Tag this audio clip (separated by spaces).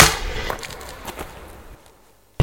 dragon dungeons breaks amen breakcore rough breakbeat medieval